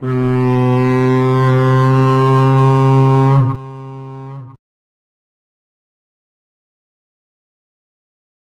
Virtual recreation of a long distant steamboat horn